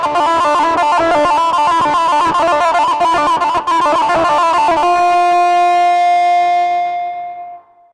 Sounds produced tapping with my finger nail on the strings of an electric guitar, with lots of distortion applied. Recording was done with an Edirol UA25 audio interface. This set of samples are tagged 'anger' because you can only produce this furious sound after sending a nearly new microphone by post to someone in France, then learning that the parcel was stolen somewhere, and that you've lost 200 Euros. As it happened to me!
(Ok, I'll write it in Spanish for the sake of Google: Esta serie de sonidos llevan la etiqueta 'ira' porque uno los produce cuando mandas un microfono por correo a Francia, roban el paquete por el camino y te das cuenta de que Correos no indemniza por el robo y has perdido 200 Euros. Como me ha pasado a mi)
guitar, strings